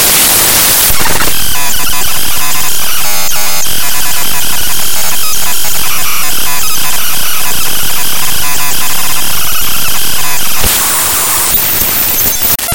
Raw import of a non-audio binary file made with Audacity in Ubuntu Studio
raw, noise, digital, distortion, glitches, computer, random, data, electronic, harsh